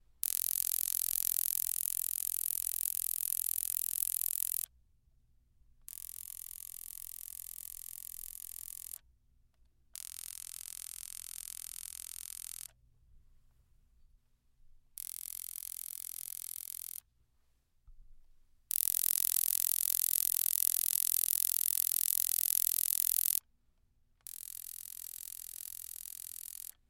Electric Sparker
Recorded this awesome tool my furnace repair man was using called a "Pilot Sparker." I recorded it in my professional ISO booth with a Lewitt LCT 640 microphone in Omni then in cardiod at various distances from the microphone.
Perfect for a tazer, shock sounds, ripped part electrical lines, shocks, weapons. Enjoy!
electrical, futuristic, electricity-lines, tazer, weapons, electrocution, taser, electricity, pilot-sparker, pulsing, electric-sizzle, power-lines, shock, buzzing, shocks, electric-shocks, sparker